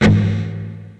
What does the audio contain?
Right hand muted power chords through zoom processor direct to record producer.
muted, chord, guitar, electric